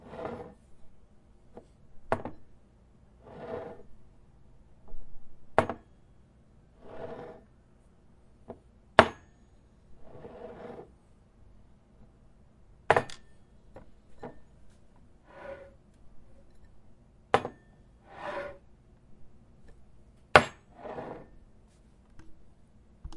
Glass on Table Movement
Cup, Glass, Movement, Moving, Table